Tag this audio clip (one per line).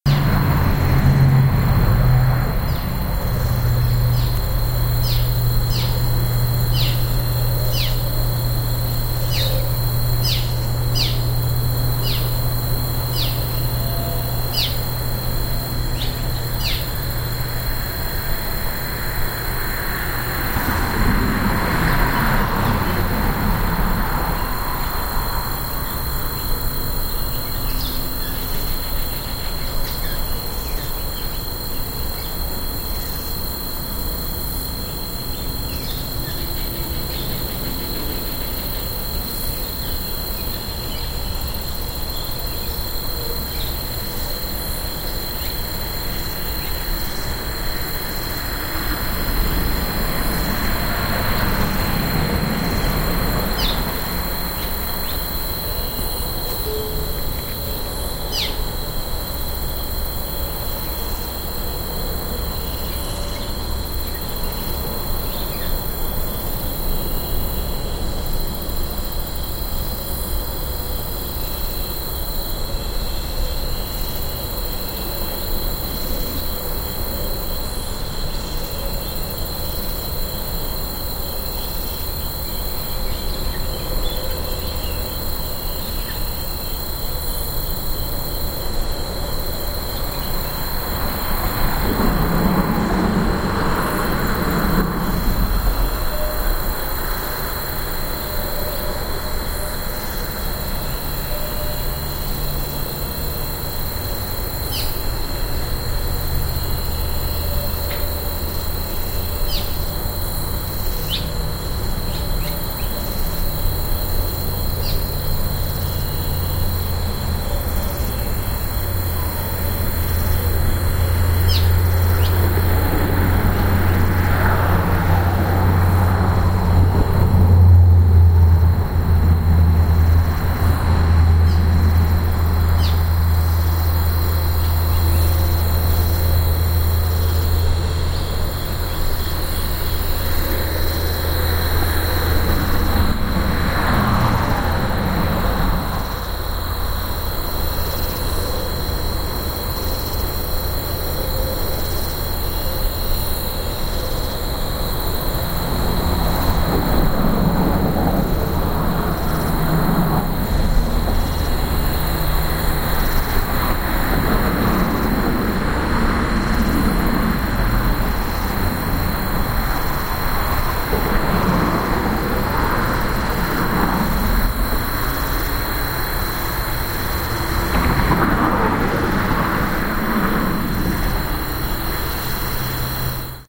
roads
field-recording
cars
crickets
at822
brush
bugs
nture
bridge
motor
engine
austin